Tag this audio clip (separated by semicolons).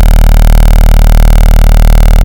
loud; electronic; machine; broken; buzz; loop